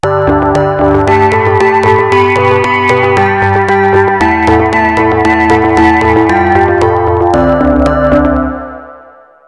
Nord Low2
Nord Lead 2 as requested. Basslines are Dirty and Clean and So are the Low Tone rhythms.